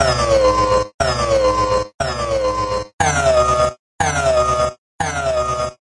Digital Data Whoosh
Data transfer in cinematic whoosh style.
air, dark, data, digital, drone, electronic, glitch, industrial, pad, pads, pass-by, robotic, space, swoosh, synth, texture, whoosh